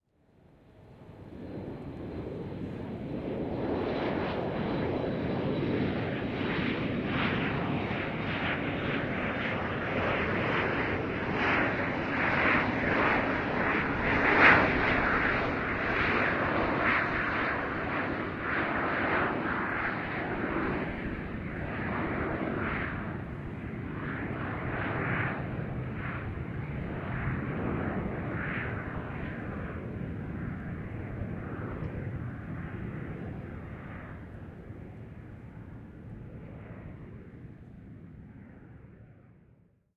Perfect Clean Airplane Taking off at Beirut airport with stereo pan
A stereo recording from the side view of the airplane at Beirut Airport taken from the runway
Stereo Rode NT4 microphone on a sound devices preamp
use and Abuse
reverb, Boing, take, aircraft, flying, Field, airport, plane, ambience, clean, natural, take-off, airplane, flight, aeroplane, runway, jet, Beirut, engine, takeoff, off